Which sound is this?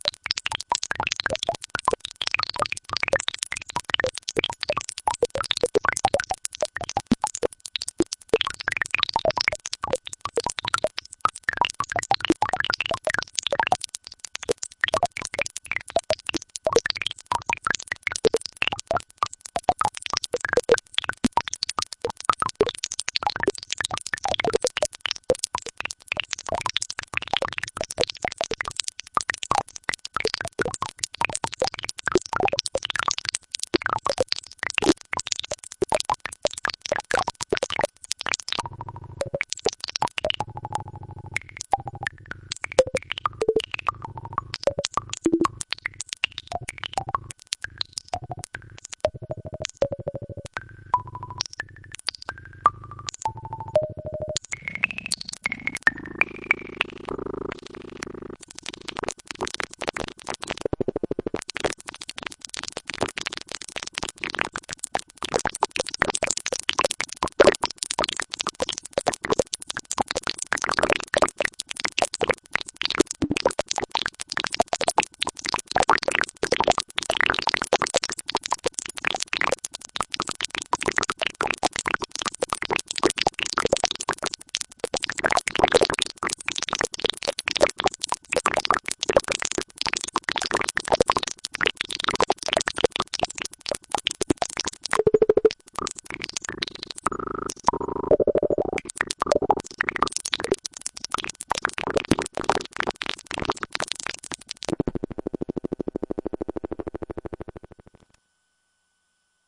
DB Animal
A chaotic dripping patch created with the Serge Animal by Devin Booze. Mostly higher-frequency sounds, good for lower and higher Vari-Speeds alike.
animal, chaos, devin-booze, drips, mgreel, morphagene, noise, serge, serge-animal